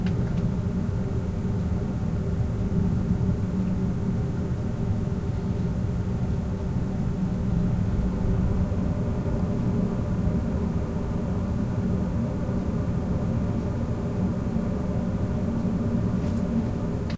recording of my air vent